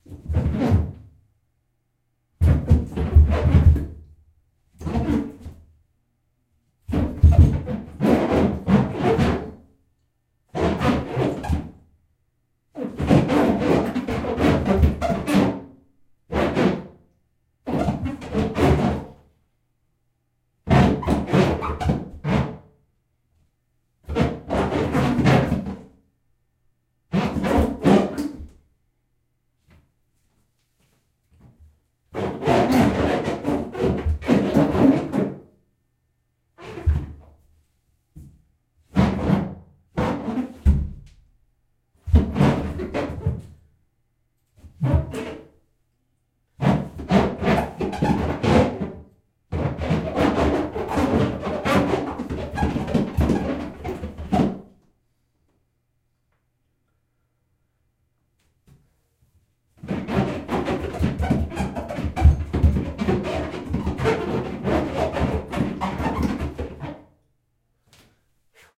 Feet rubbing the bottom of the bathtub making squeaks no water in the tub